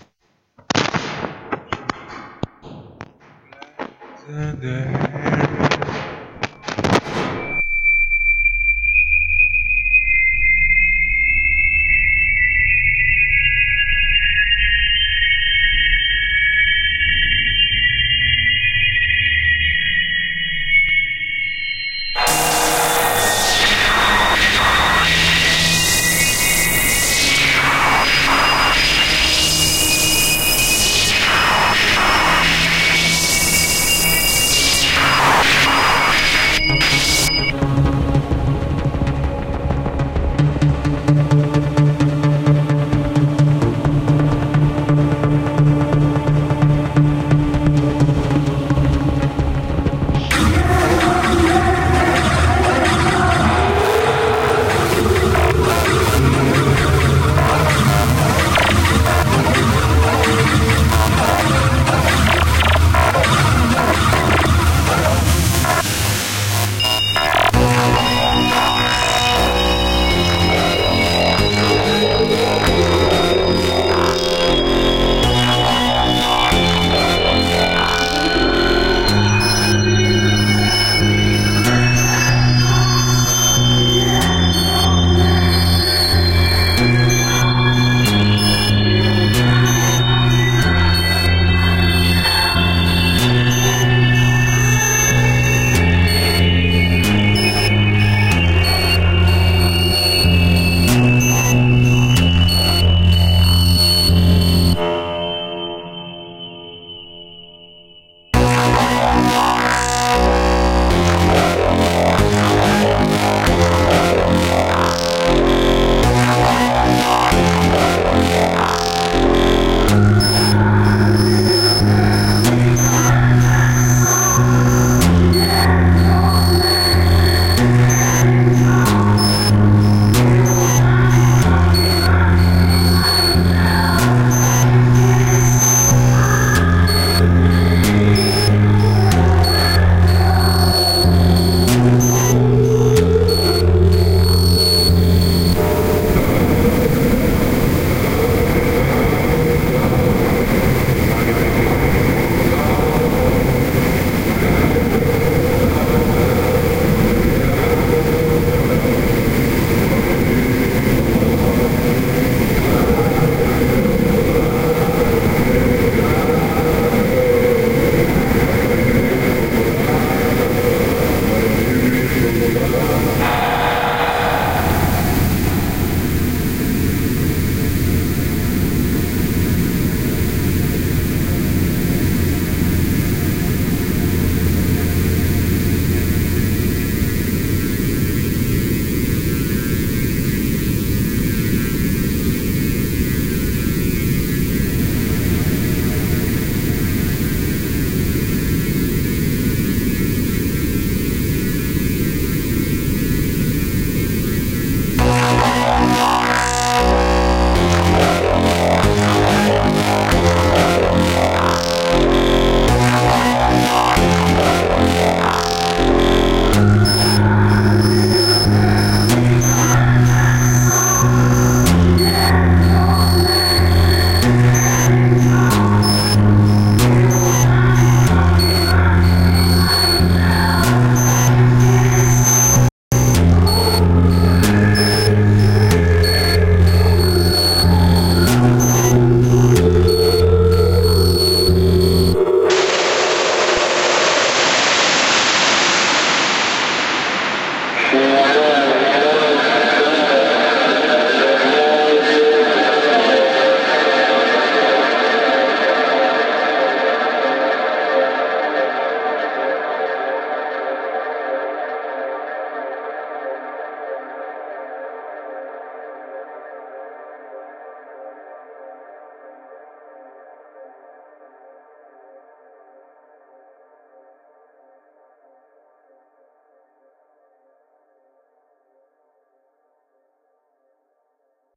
soundnumber DOG41000% dedicatedtoola3hairislong
Dog, horse, Alien, King, EL, sound